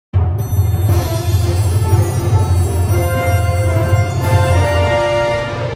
Synthesised orchestral intro sound
Sound reconstructed with help of multi band sine model.
Here is params of analysis:
"name": "winner1",
'NS': [16384, 16384, 16384],
'wS': [851, 901, 851]
sine-model, synthesized, orchestral